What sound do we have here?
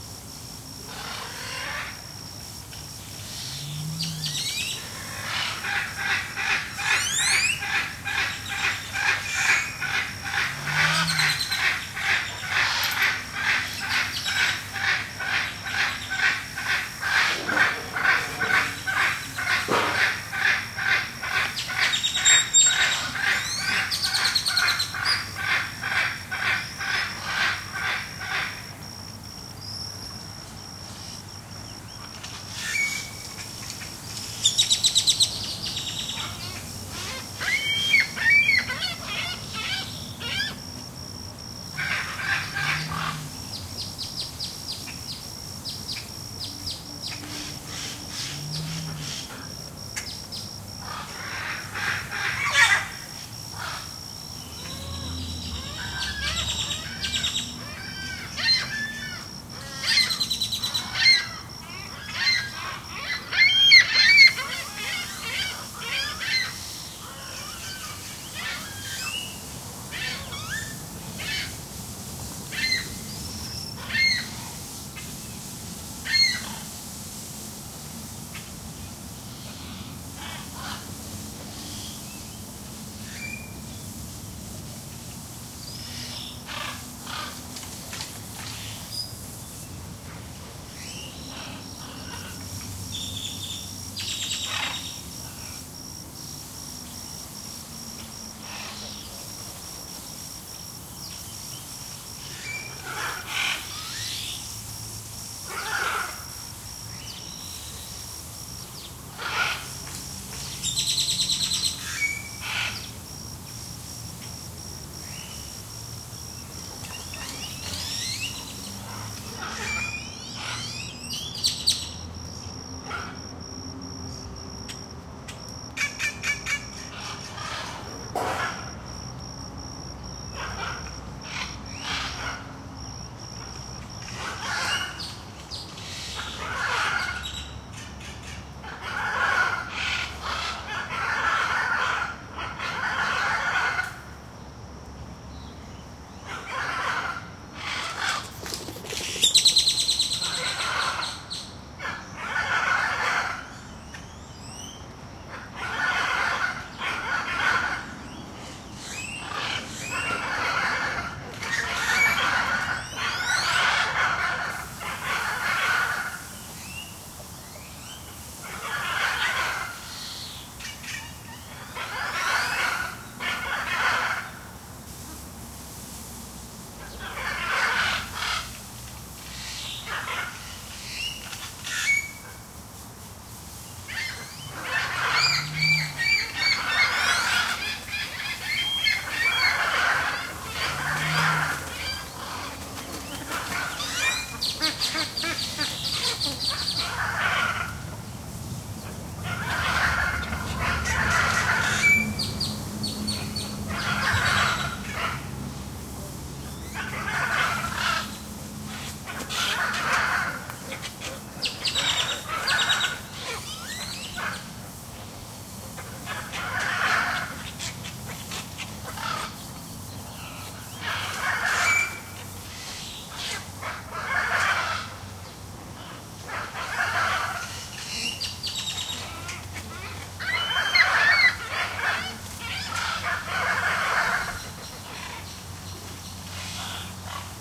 Recorded at the Dallas Zoo. This is in the Bird Valley. The main part of this exhibit contains a large aviary that houses various waterbirds and parrots. In the recording can be heard various macaws, gulls, and spoonbills as well as wild grackles. A zookeeper was cleaning the aviary during the recording.
aviary, cleaning, field-recording, flying, gull, macaw, parrots, spoonbill, valley, water, zoo